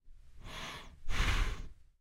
Bear breath, emulated using human voice and vocal transformer